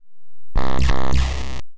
135 Moonshine club synth 01
variety, gritar, crushed, blazin, synth, distort, bit, guitar